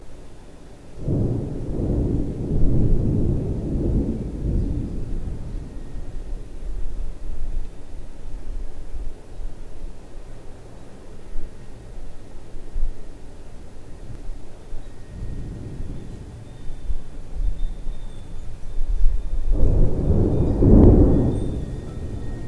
distant thunder with brake in middel
thunder and rain u can use in whatever u like
rain, thunder-storm